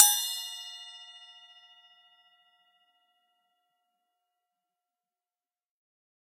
SC08inZilEFX1-Bl-v03
A 1-shot sample taken of an 8-inch diameter Zildjian EFX#1 Bell/Splash cymbal, recorded with an MXL 603 close-mic and two Peavey electret condenser microphones in an XY pair.
Notes for samples in this pack:
Playing style:
Bl = Bell Strike
Bw = Bow Strike
Ed = Edge Strike
cymbal, 1-shot, multisample, velocity